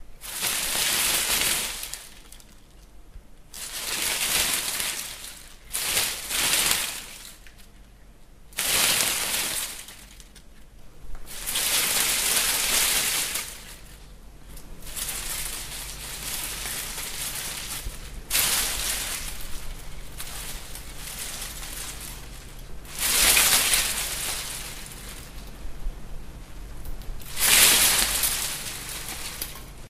moving plant
Sweeping an IKEA plant back and forth. Used as sound effects for one of my video animations
leafs, Moving, wiggle